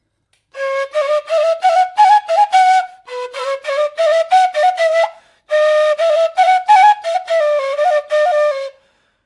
i play greek flute in my home studio